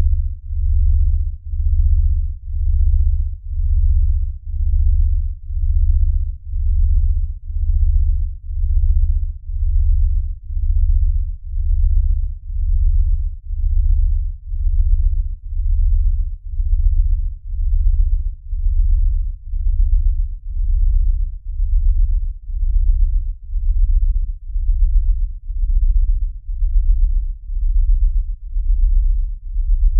Long multisamples of a sine wave synthesized organ with some rich overtones, great singly or in chords for rich digital organ sounds.
drone, synth, organ, pad, multisample